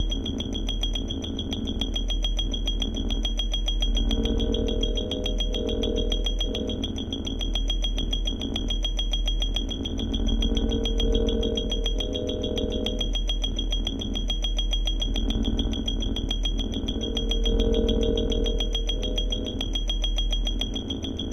percussive cinematic texture
ambience, ambient, atmosphere, backgroung, drone, electro, experiment, film, illbient, pad, percussion, percussive, sci-fi, score, soundscape, soundtrack, strange, suspence, texture, weird